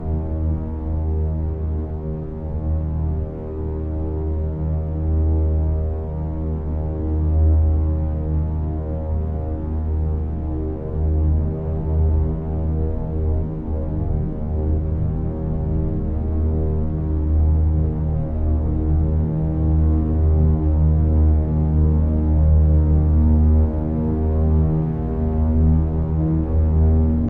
A horn sound signaling danger approaching. Gradually increasing, it's basically saying, "Oh crap, WHAT IS THAT?" Originally recorded on a Zoom H2, it's a sound of me humming. Of course it's been filtered, slightly stretched and had distortions of a high degree thrown in and filtered more to achieve the horn sound. Enjoy!